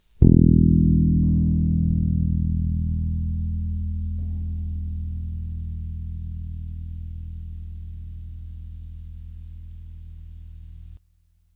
Bass - piano - final
It is a sample recorded with an electric bass, using M-AUDIO Maya 1010, in order to analyze its spectrum content
1010, baixo, bass, fraco, m-audio, maya, piano